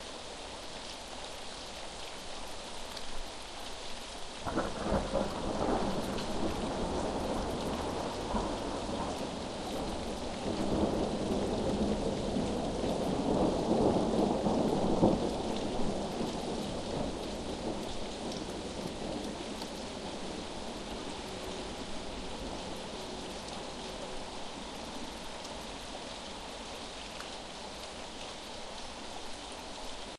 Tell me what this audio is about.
AMBIENT - Rain - Soft Thunder (LOOP)
long loop of muffled Rainfall next to a house, deep rumbling and crackling thunder rolls across the sky.
Rain can be heard splattering on ashphalt and on tree leaves, as well as dripping from a drainpipe.
Somewhat muffled.
crackle, deep, drainpipe, field-recording, muffled, nature, outdoors, rain, rainfall, rumble, shower, sprinkle, weather